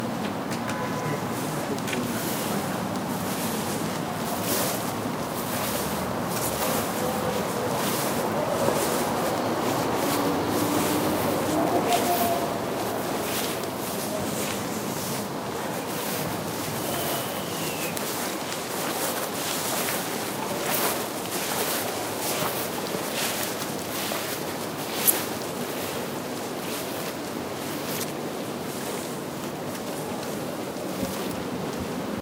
3 persons walking slowly on grass noisy ambient 1
footsteps, grass, slowly, steps, walking